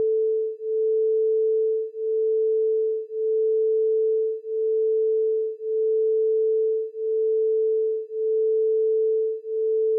Generate a sound then choose
Waveform: sinusoid
Frequency of the sound: 440Hz
Amplitude: 1
Time of the sound: 10 second
Click effect
Effect: phaser
Phase: 2; Frequency: 0,4; Depth: 100
Decrease the gain: -18 dB